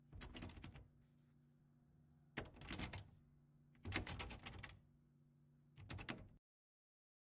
Locked Door Handle Rattle multiple
Locked door handel rattle trying to be opened